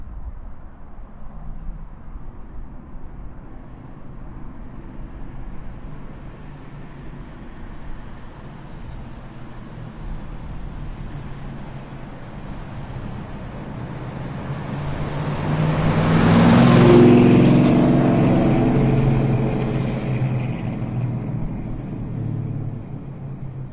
Dodge Road Runner doppler
A doppler (stereo of course) car by of a classic muscle car, The Dodge Road Runner.
Car-by, Doppler, Hemi, Road-Runner